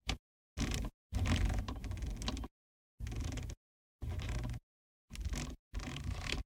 dial01mono
Dashboard dial sounds for a button pack
dashboard, dial, turn